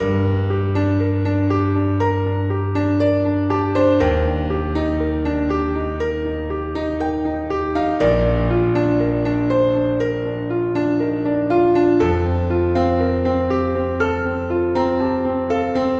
Emotional Piano
emotional-piano, drama, emotional, awesome, piano